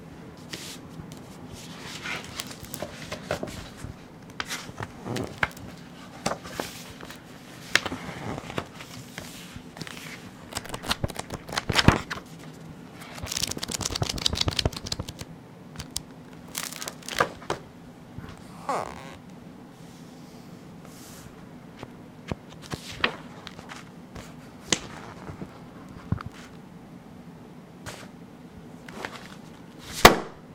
large book search
looking through a large book
book, page-turn, paper, search, shuffle